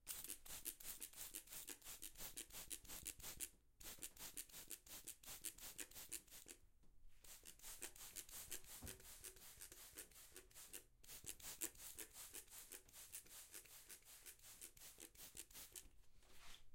The sound of a spray bottle